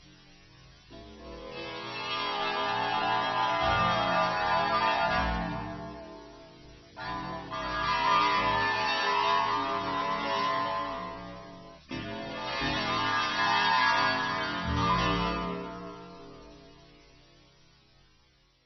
Metallic Discord Bells